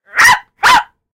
Angry Small Dog Bark
A recording of my talented dog-impersonating sister on my Walkman Mp3 Player/Recorder. Simulated stereo, digtally enhanced.